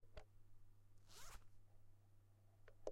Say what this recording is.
This is the sound of a zip opening and closing
bathroom, clothing, zip